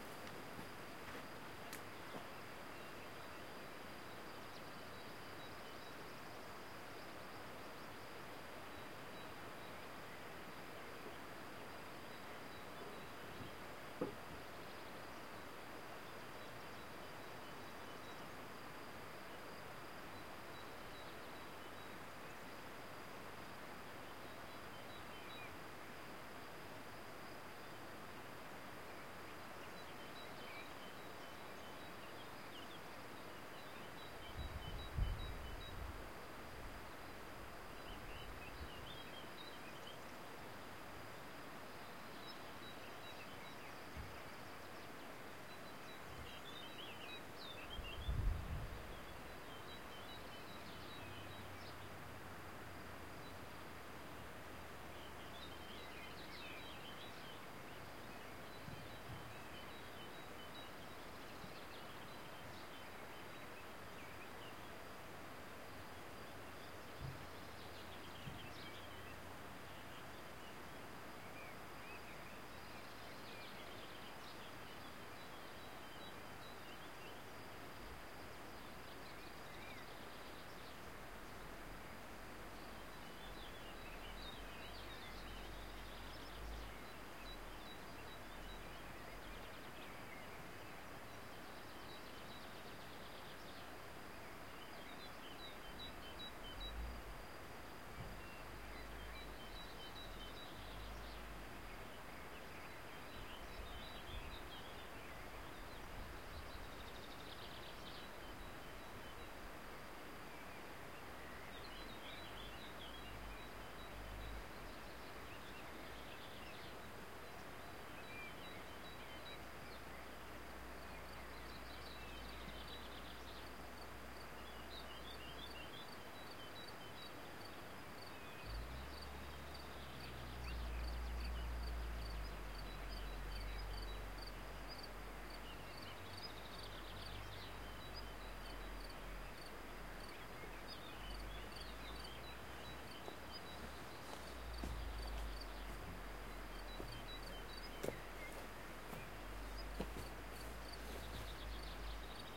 AMB - Edrada Stereo
Stereo recording of the ambient from Edrada, Ourense, Galicia.
Galicia, ambient, birds, mountain, nature